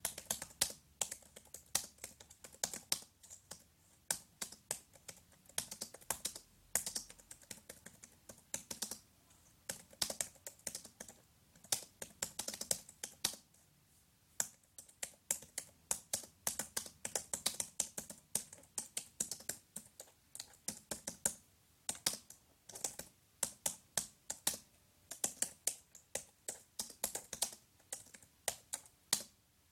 Typing on an Apple keyboard. This one sounds the most realistic of all my keyboard sounds in my opinion.
apple, computer, keyboard, keys, mac, type, typing